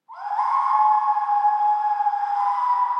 whistle howl 2.22-2.25
flute whistle reverb
flute, reverb, whistle